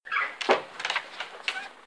Old door open
A realistic, old opening door sound, for example RPG, FPS games.